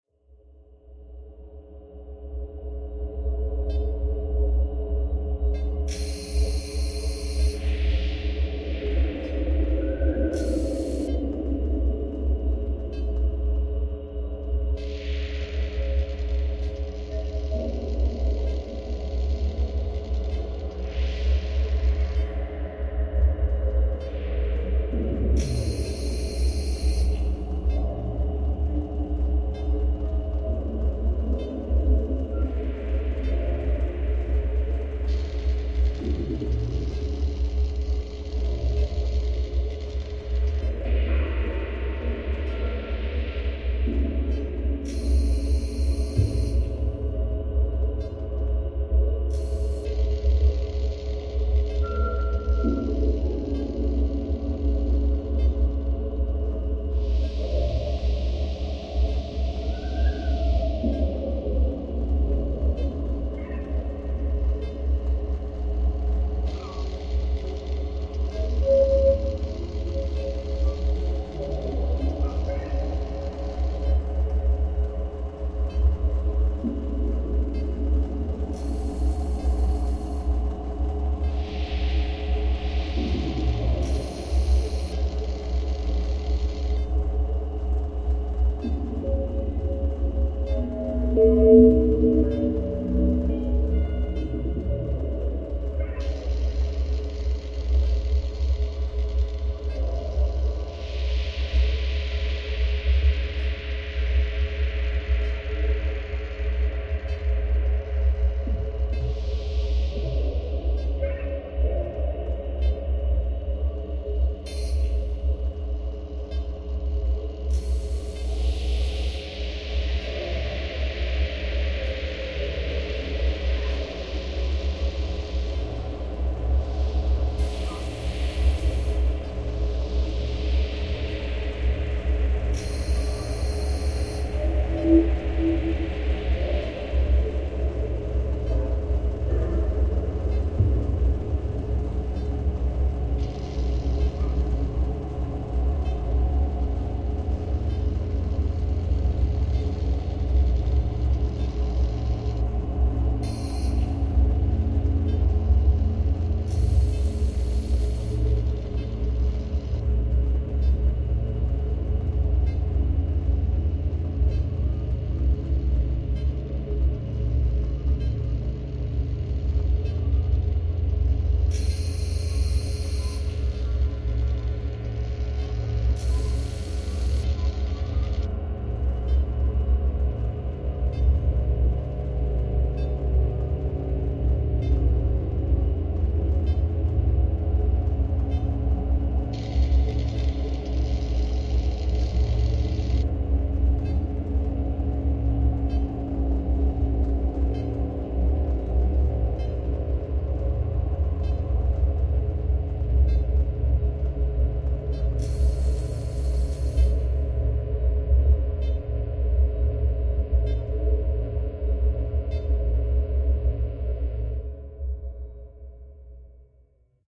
3am in a deserted spaceport
Sci-Fi industrial atmos.
It's 3:00 a.m. and you're stuck, alone, red-eyed and waiting for a shuttle to get you off this miserable, wet backwater world. The spaceport is deserted except for the cleaning mechs and a corroded welding repair bot. You wish you were anywhere else but here.
Filtered, flanged, reverberated noises. All synthesized within FLStudio 11.04. The electrical spark sounds were output from Shlejonkin Alexander's (Syncersoft) Ambient Electrical Noise Lite VST.
Ambient, Atmosphere, Drone, Fururistic, Industrial